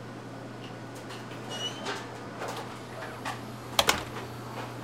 back door open and close
This is a recording of the back door at the Folsom St. Coffee Co. in Boulder, Colorado. The door opens and then closes, repeatedly.
coffee, door, shop